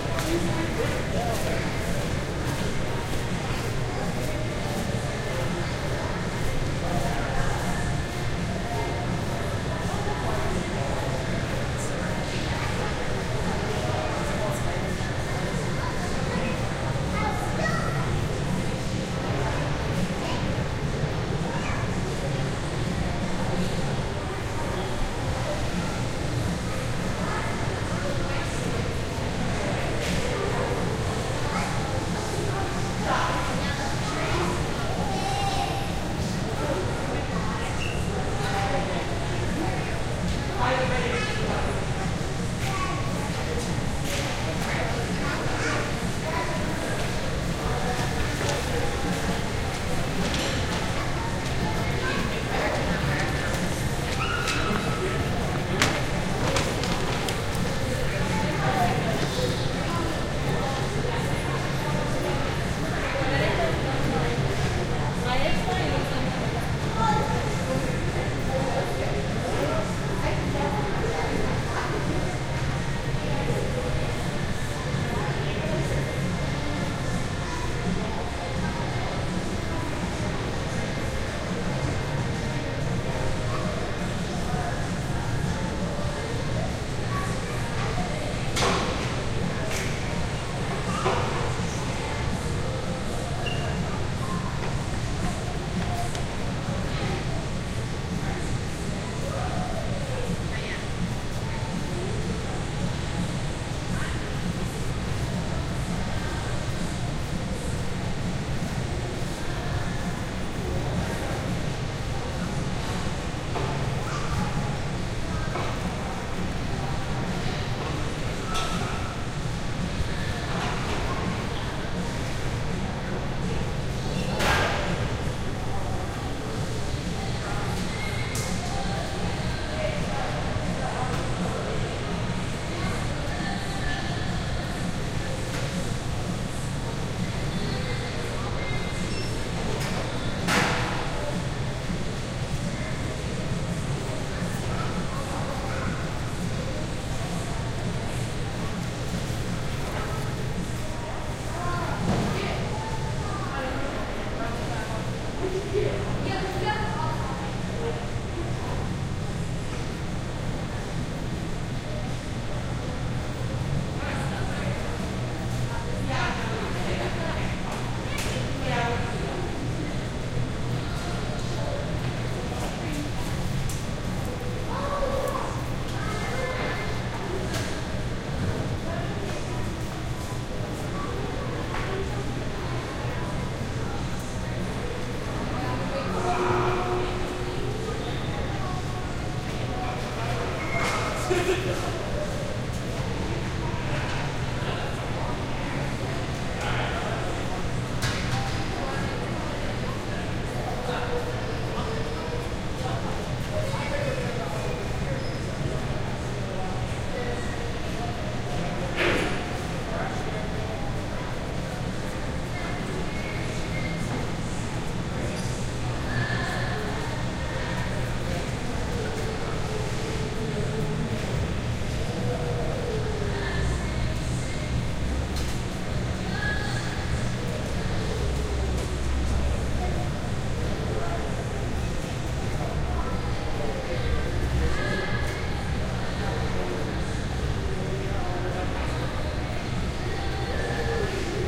Mall, Next to a Store
Several shops playing music next to each other, near a foodcourt. People with bags walk past.
echo, store